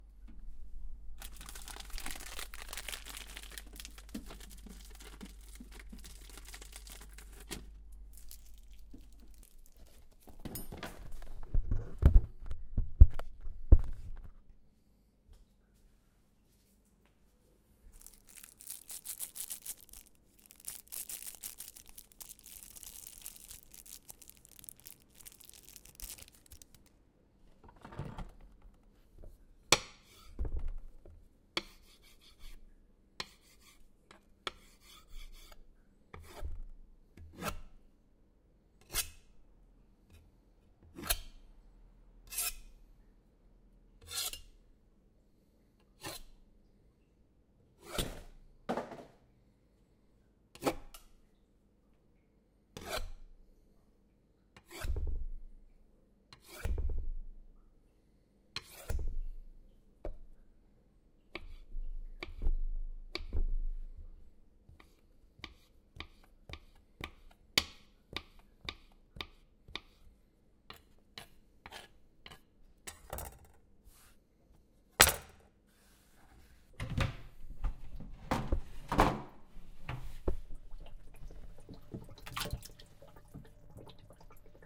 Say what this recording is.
preparing food for aquatic turtles and feeding
aquatic, feeding, food, preparing, turtles